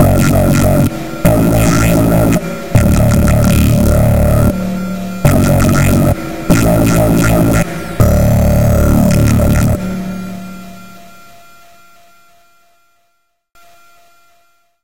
Dark step synth fgh 01
Darkstep, distorted, dnb, dubstep, growl, hard, jungle, synth